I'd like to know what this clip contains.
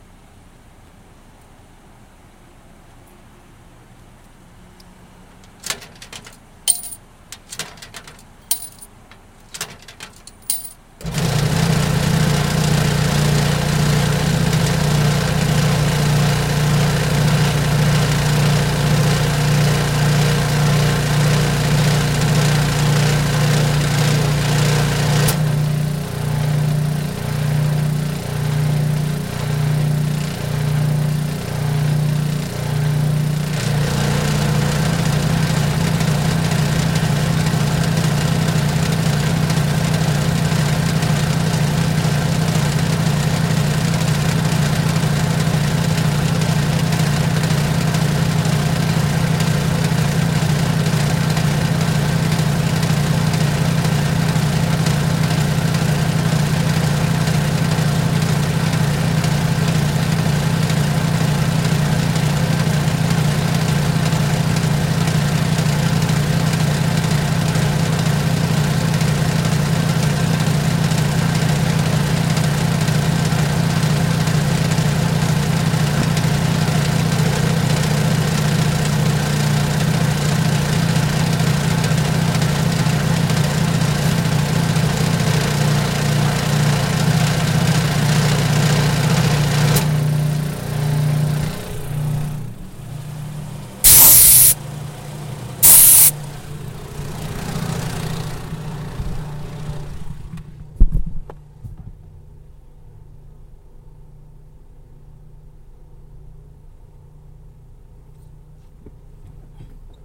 Me at the gas station putting air in my tire recorded with my HP laptop and a Samson CO1U USB mic.